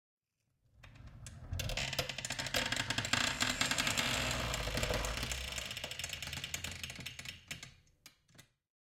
CREAK HINGE CREEPY
It can be used for machinery or opening things.